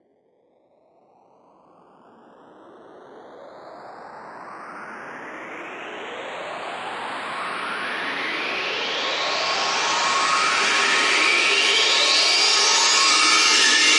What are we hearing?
Riser Cymbal 02
Riser made with Massive in Reaper. Eight bars long.
riser, trance